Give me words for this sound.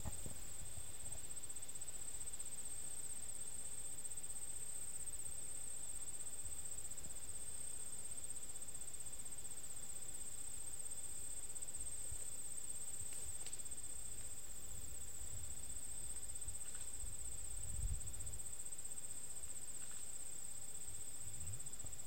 Daytime - crickets in the woods
Audio captured at Allegheny State Park. Nature sounds in the foreground dog in the background